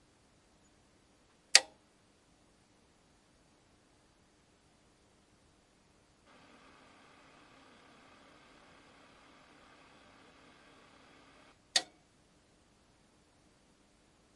STEREO ON/OFF
The sound of an old Technics stereo turning on and off, just before the programme on AM radio can be heard.